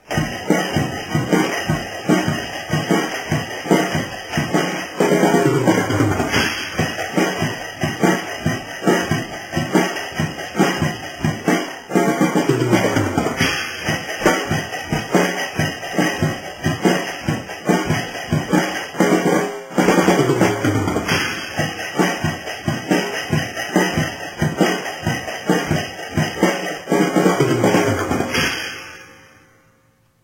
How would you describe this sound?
REKiddrums3Elements
3rd unedited clip with faster tempo drum beat same kid...
beat, dirty, drum, lofi, loop, percussion, roll